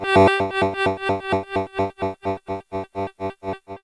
ADSR G Low 05
Part of my sampled Casio VL-Tone VL-1 collectionADSR programed Bass in G higher octave alternate arp